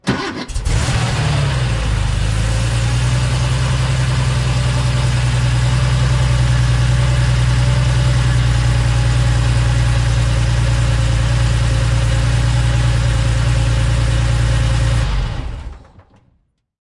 Porsche idle
sports, engine